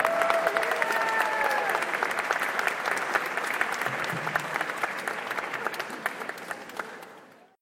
Parents clapping and cheering. One "woo" and one "yeah" can be heard, along with mic tapping. This was recorded using an iPhone using Voice Memos at Windward Mall.
applause, audience, cheer, clap, crowd, parents